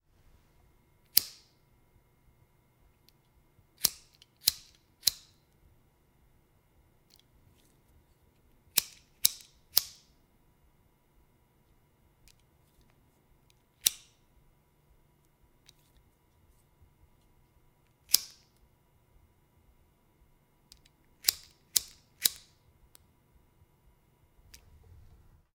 Me lighting a disposable Bic lighter.
smoking, spark, flame, tobacco, fire, bic, disposable, cigarette, lighter